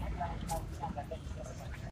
Registro de paisaje sonoro para el proyecto SIAS UAN en la ciudad de santiago de cali.
registro realizado como Toma No 04- voces 1 vendedor plazoleta san francisco.
Registro realizado por Juan Carlos Floyd Llanos con un Iphone 6 entre las 11:30 am y 12:00m el dia 21 de noviembre de 2.019
Toma, 1, 04, Palmira, Sonoro, voces, Paisaje, Of, vendedor, Sounds, No, SIAS, Soundscape, Proyect